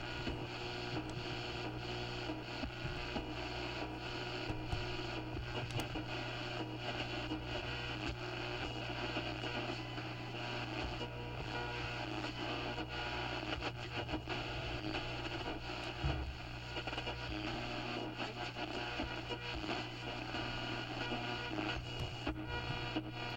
switching through static channels